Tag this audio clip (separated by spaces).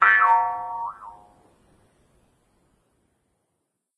mouth-harp guimbarde